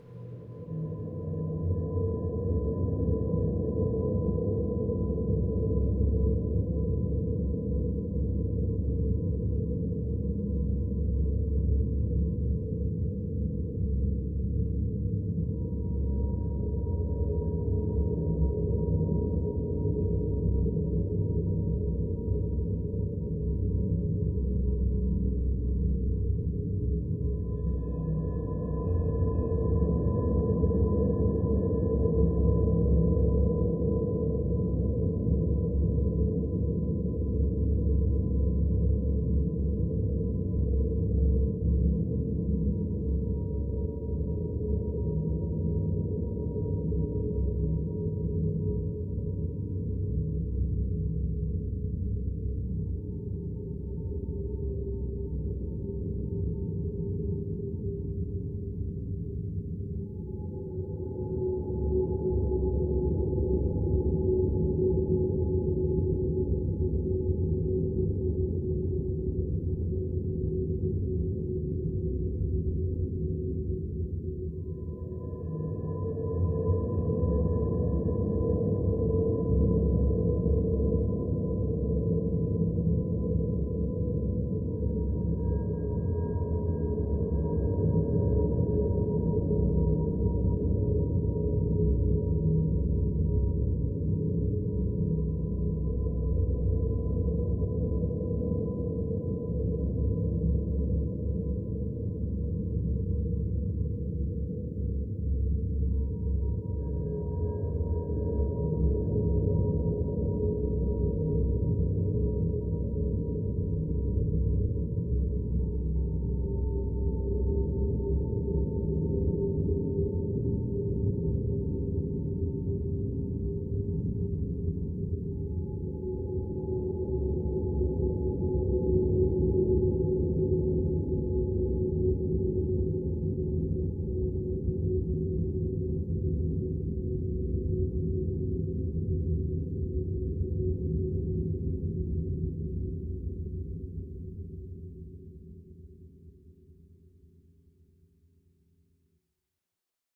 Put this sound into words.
Horror ambient created to bring an empty and scary feeling to a project. Created with a synthesizer, recorded with MagiX studio, edited with MagiX studio and audacity. Enjoy!